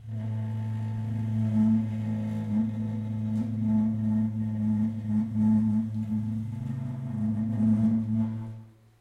Queneau Grince Chaise Table 02
frottement grincement d'une chaise sur le sol